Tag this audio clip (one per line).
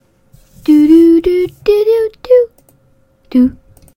junk
random
singing